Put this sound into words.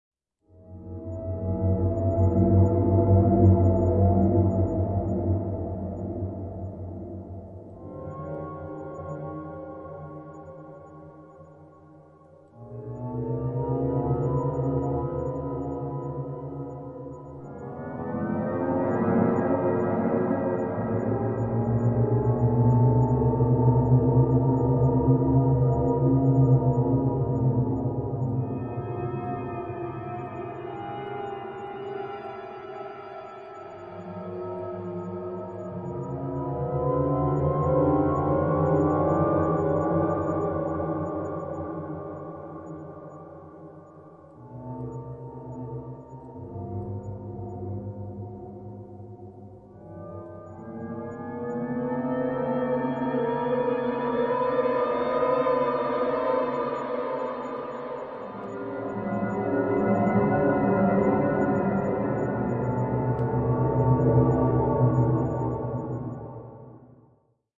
A sound for video background. I made this with FL Studio.